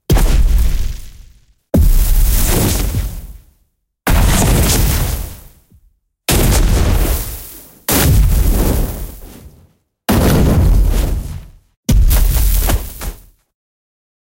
Fire Magic Impact
ignite, rpg, flame, wizard, burning, impact, fire, magic, gameaudio, mage